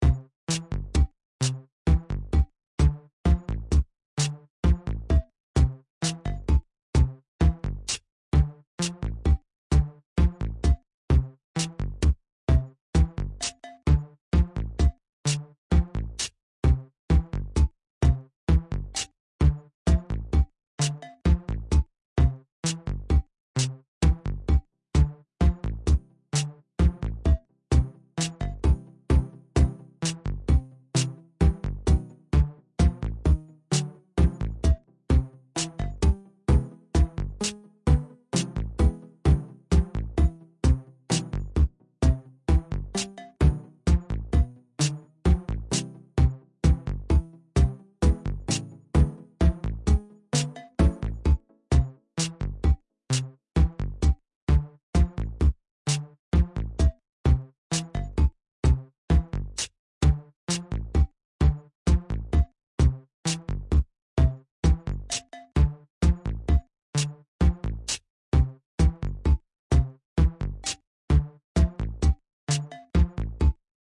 Level music brackground
Level/Menu song for videogames